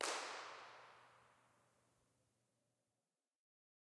3AUC IR AMBIENT 004
These samples were all recorded at Third Avenue United Church in Saskatoon, Saskatchewan, Canada on Sunday 16th September 2007. The occasion was a live recording of the Saskatoon Childrens' Choir at which we performed a few experiments. All sources were recorded through a Millennia Media HV-3D preamp directly to an Alesis HD24 hard disk multitrack.Impulse Responses were captured of the sanctuary, which is a fantastic sounding space. For want of a better source five examples were recorded using single handclaps. The raw impulse responses are divided between close mics (two Neumann TLM103s in ORTF configuration) and ambient (a single AKG C426B in A/B mode pointed toward the roof in the rear of the sanctuary).
ambient, avenue, choir, church, impulse, location-recording, response, sanctuary, third, united